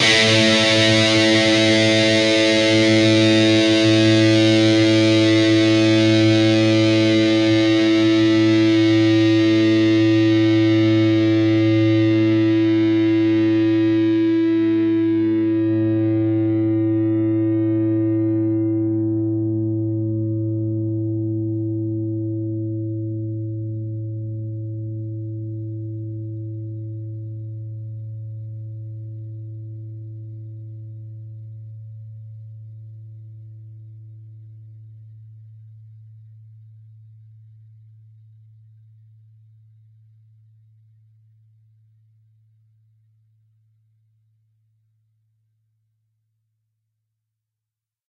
Dist Chr A oct
A (5th) string open, and the D (4th) string 7th fret. Down strum.
chords, distorted, distorted-guitar, distortion, guitar, guitar-chords, rhythm, rhythm-guitar